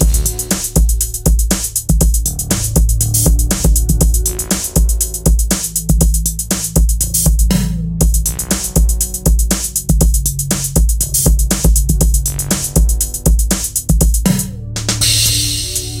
D-A-Bm-G Rhythm section of song. Made with FL Studio on 06/22/2019 for public entertainment.